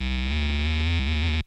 samples taken from an Arius Blaze Circuit-Bent OptoThermin. recorded clean (no reverb, delay, effects) via a Johnson J-Station Guitar Amp Modeller/Effects Unit with a minimum of EQing.
gabber, glitch, glitchy, harsh, idm, warble